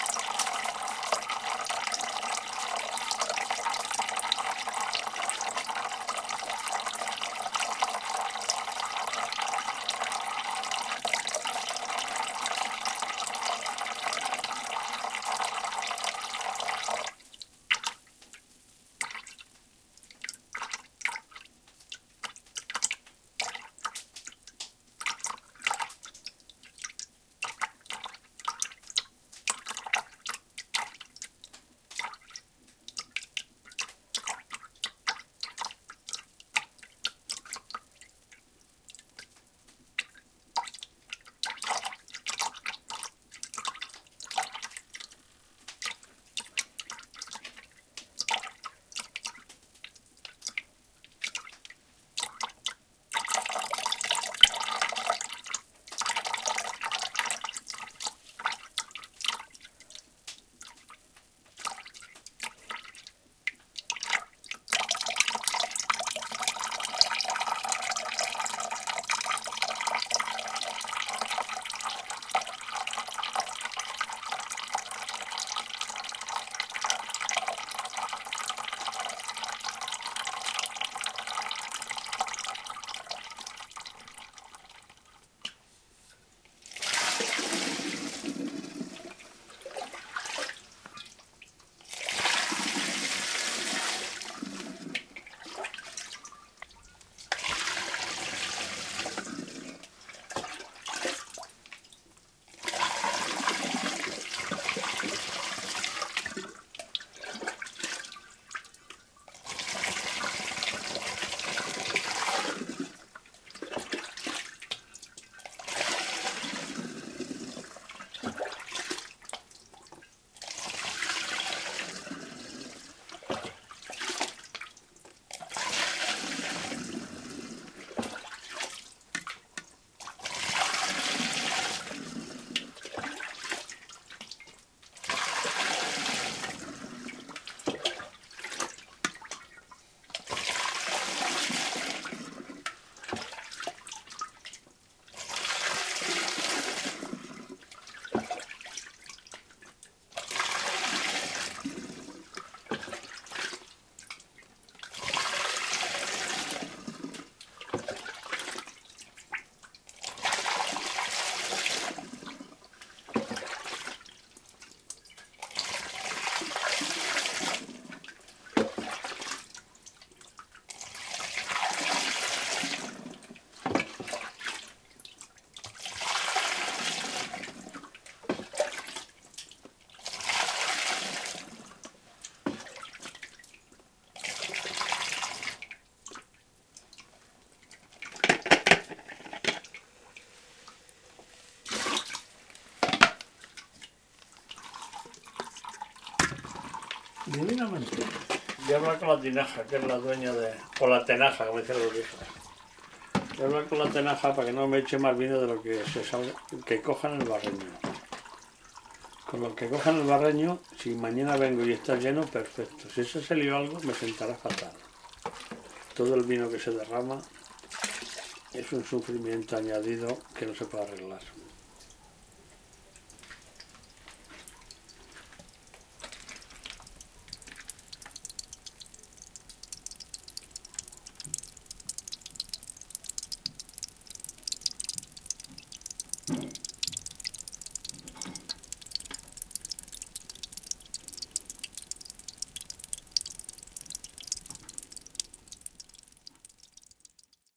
Correr el vino :: Racking wine
Es frecuente hacer vino artesanal en el Valle del Tiétar.
"Correr el vino" es dejarlo salir lentamente de la tinaja a otro recipiente para decantarlo tras la fermentación.
En el audio se escucha el chorro del vino saliendo de la tinaja, el llenado de una garrafa y comentarios sobre el vino.
Grabado en el invierno del 2015 en el pajar de Félix.
It is frequent to make artisanal wine in the Tietar valley.
Racking is moving wine from one barrel to another using gravity to decant it after fermentation.
You can hear the wine trickling out of the barrel, filling out a bottle and opinions on wine.
Recorded in the winter of 2015 at Felix's barn.
splash, flow, La-Adrada, liquid, artesania, Spain, trickle, lore, vino, liquido, tradiciones